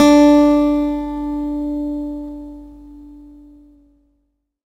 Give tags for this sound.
guitar; multisample

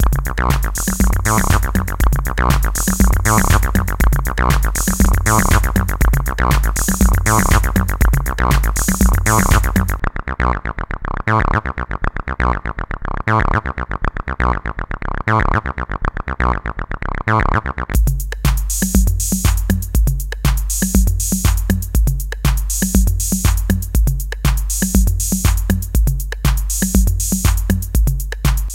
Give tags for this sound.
303; 808; bass; beat; drums; loop; roland

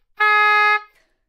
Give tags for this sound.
good-sounds; Gsharp4; multisample; neumann-U87; oboe; single-note

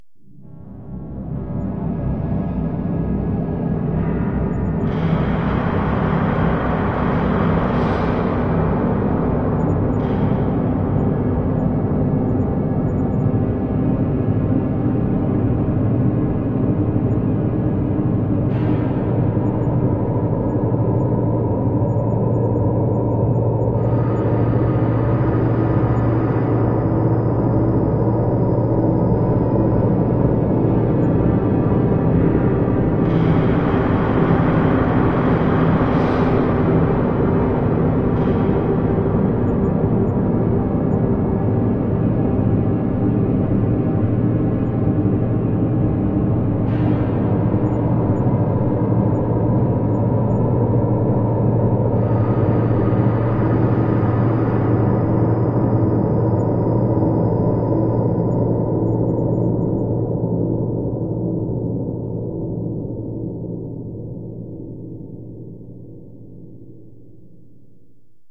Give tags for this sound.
ambiance; Ambient; Dreamscape; Future; Garage; Noise; Textures; Wave

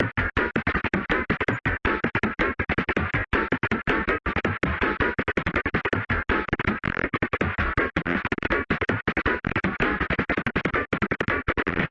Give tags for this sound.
dnb; idm; processed

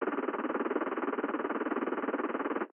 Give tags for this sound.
digital; movie; beeps; appear; data; print-fx; interface; computer; topic; typing; film; sfx; bleep; print; screen; text; caption; osd; print-screen; emerging; scroll; title; cursor; fx; typing-fx; screen-print; subtitle; keystroke; UI

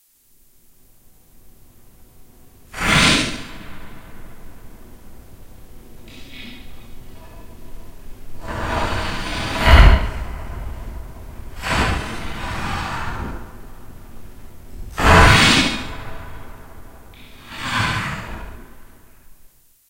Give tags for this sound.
fi; fiction; laser; sci; science-fiction; science; energy; sci-fi; zap

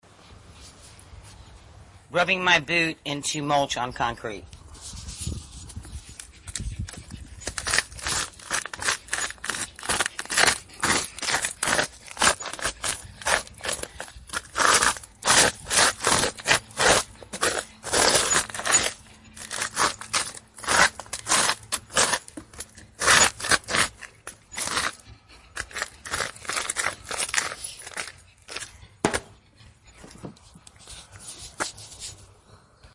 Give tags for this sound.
foley; boot; smash; crackle; concrete; crushing; crunching; crush; crack; sound; crushed; dry; rustle; crunch

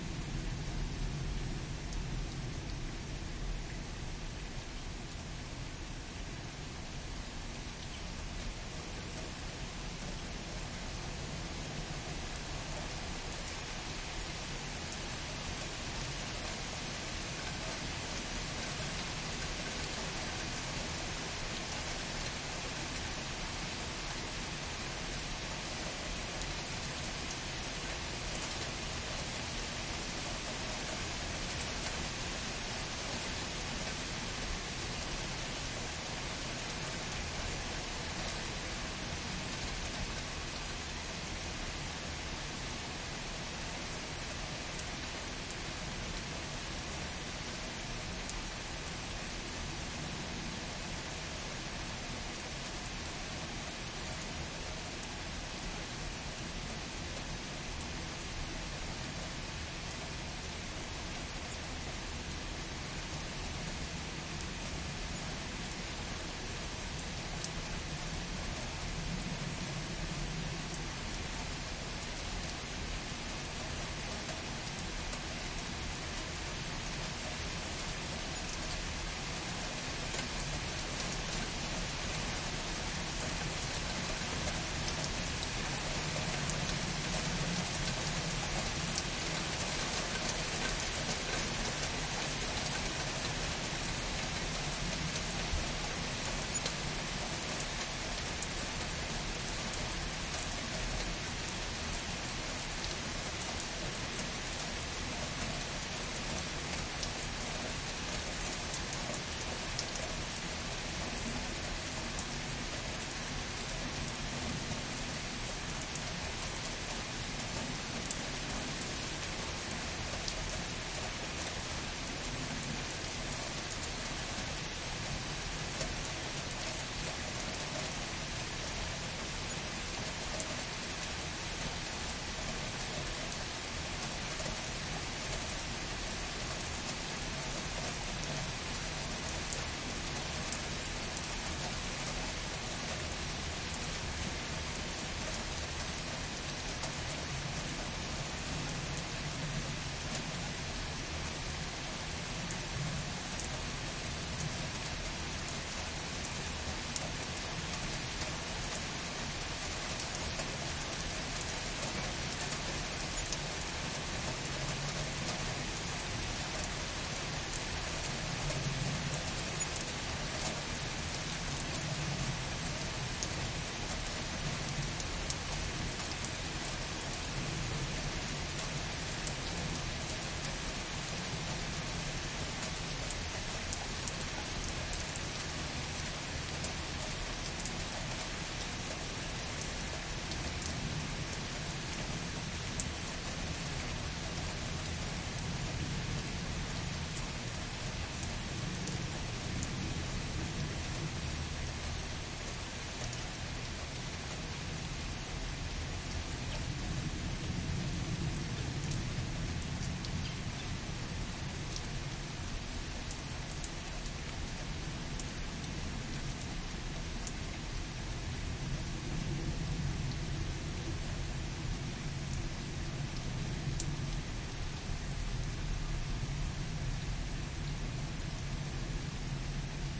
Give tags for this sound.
drops; EM172; H1; rain; raindrops; shower; weather; Zoom

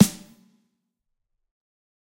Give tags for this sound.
realistic; snare; set; kit; pack; drum; drumset

